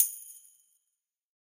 Modern Roots Reggae 13 078 Gbmin Samples